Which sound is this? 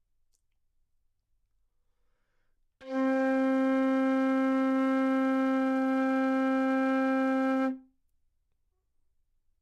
Part of the Good-sounds dataset of monophonic instrumental sounds.
instrument::flute
note::C
octave::4
midi note::48
good-sounds-id::2986